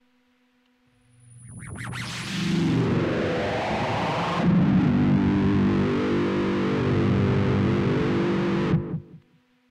Sample taken from Volca FM->Guitar Amp.